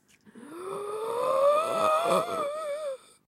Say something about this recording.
Good quality zombie's sound.
breath, zombie